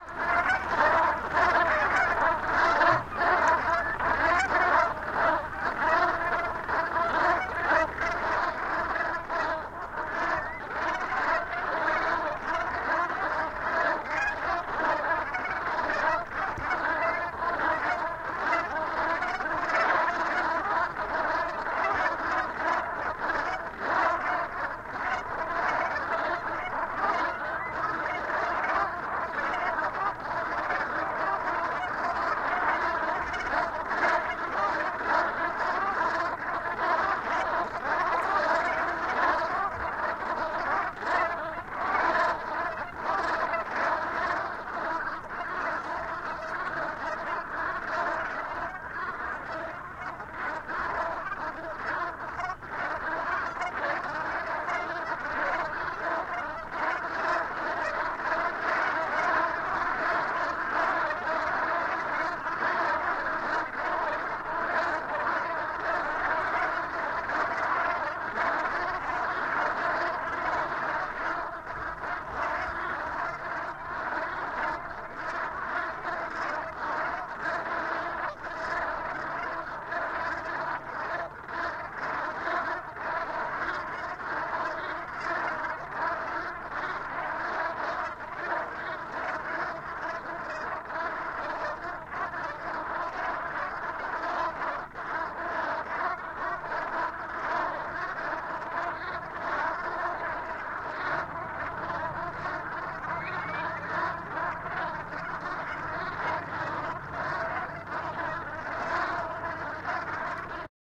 brent goose in Arcachon, France. large group of birds sit on water . gentle sound of water associate
record with couple of oktava mk-012 mic and fostex fr2